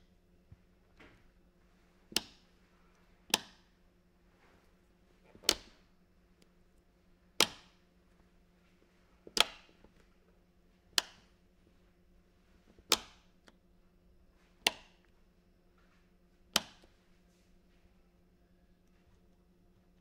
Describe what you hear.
small single plastic impacts
small plastic tiles dropped on table
drop, plastic, impact